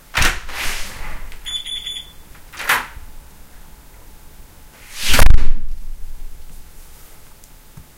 Opening and closing entrance door to garage
The sound heard when a person pulls open and lets go of a door that leads out to a house's garage. The beeping you hear is the security system's chime coming from a Honeywell keypad attached to a wall. This sound was recorded in the laundry room of that house
door, opened, security, garage, laundry, handle, beep, alarm, slam, open, opening, doors, closed, shut, closing, room, beeping, wooden, chime, close, beeps, house, slamming, laundryroom